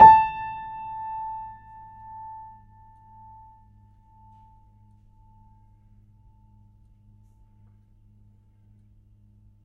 My childhood piano, an old German upright. Recorded using a Studio Projects B3 condenser mic through a Presonus TubePre into an Akai MPC1000. Mic'd from the top with the lid up, closer to the bass end. The piano is old and slightly out of tune, with a crack in the soundboard. The only processing was with AnalogX AutoTune to tune the samples, which did a very good job. Sampled 3 notes per octave so each sample only needs to be tuned + or - a semitone to span the whole range.
It is a dark and moody sounding, a lot of character but in now way "pristine".
german, multi, old, piano